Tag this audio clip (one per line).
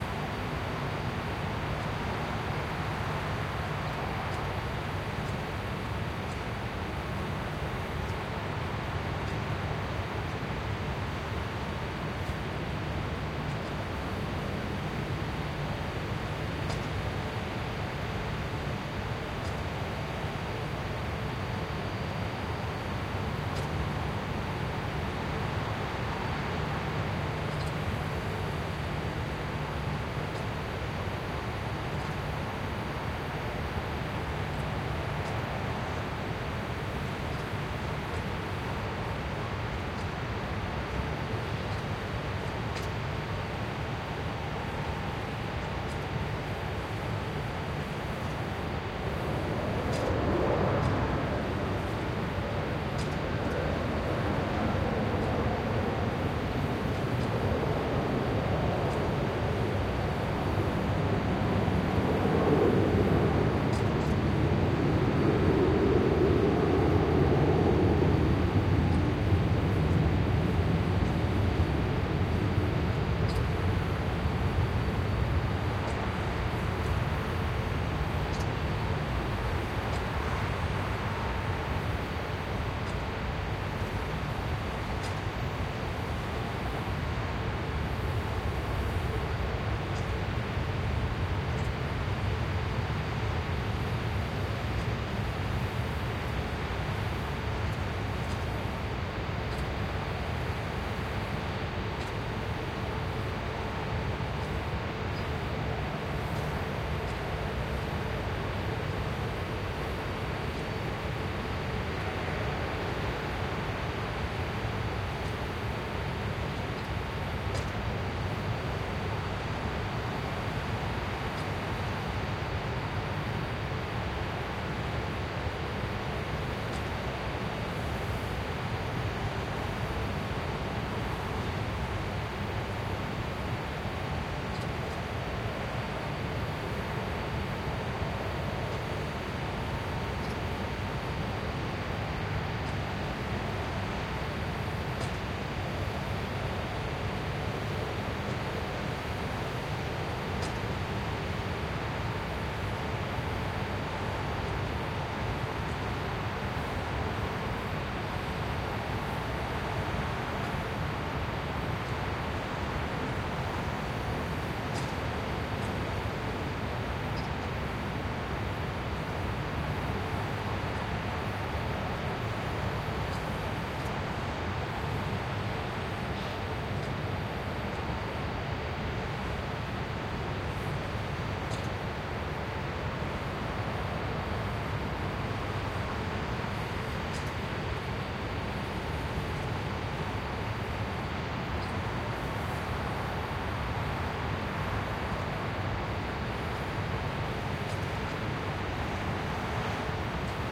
airplane
factory
field-recording
industrial
machinery
night